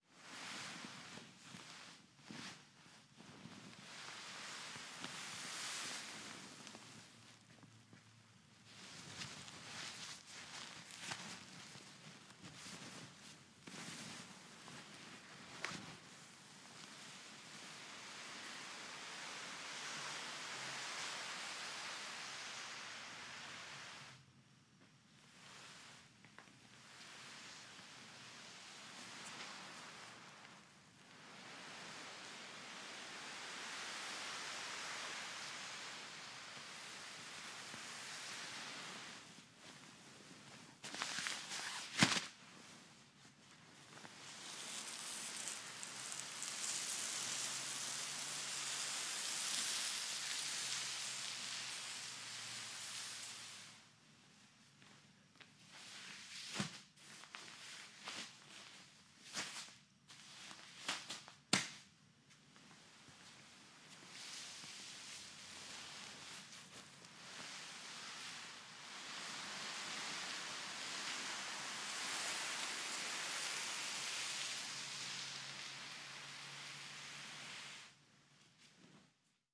I dragged a cloth tarp with sandbags on it around a concrete floor to simulate dragging a dead body.
dead, drag, foley, body, limp